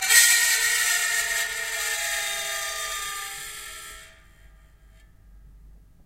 fingernails won't save you 2
recordings of a grand piano, undergoing abuse with dry ice on the strings